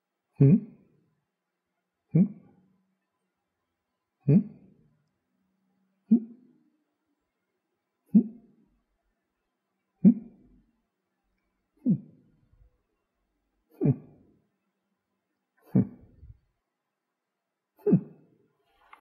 A few "hm" sounds.